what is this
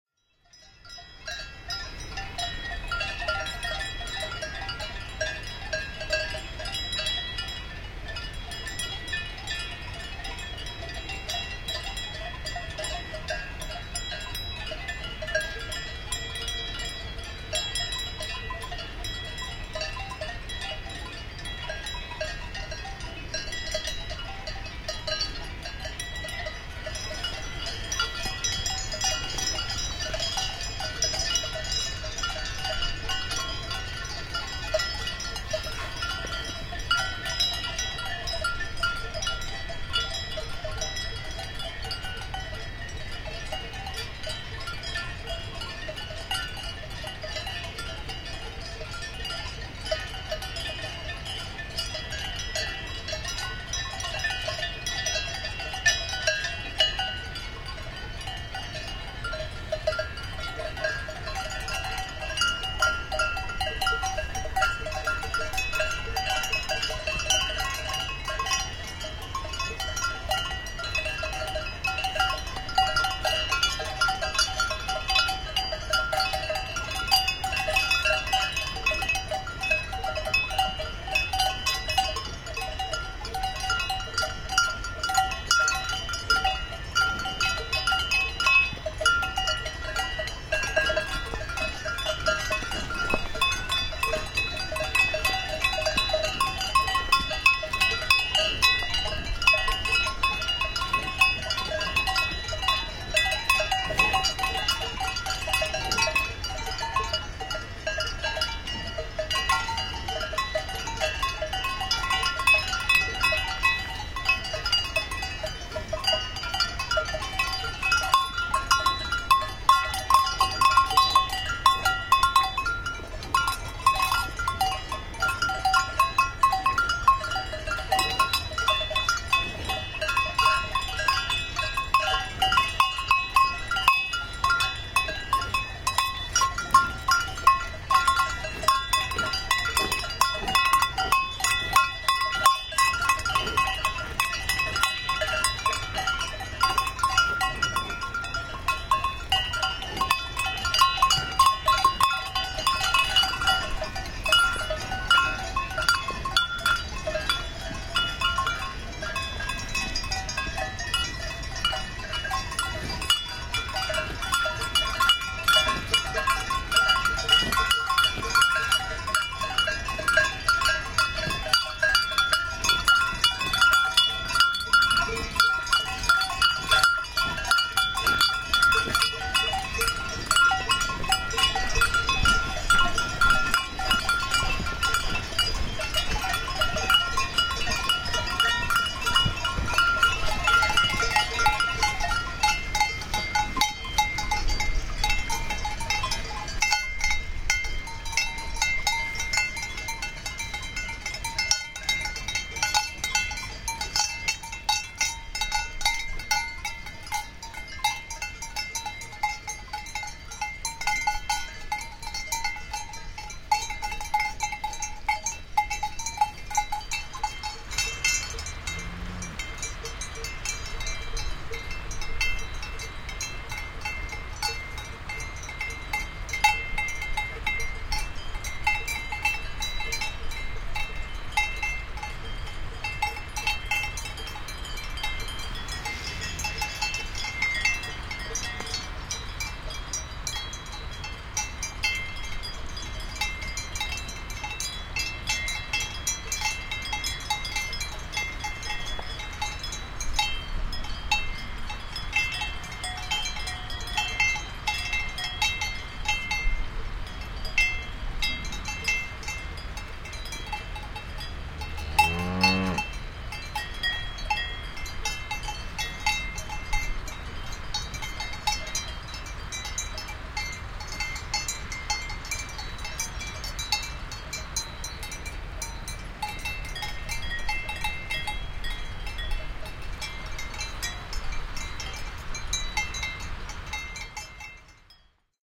Cowbells, Herd of Cows, Austrian Alps
Recording of a herd of cows with cowbells in the austrian alps.
Recorded in binaural technique while walking through the herd.
This results in different acoustic perspectives: far - near - far.
"Moo" at 4m22s.
Slightly sound of a creek in the background.
alps, animal, countryside, cow, cowbell, cows, farmland, field-recording, grazing, pasture, rural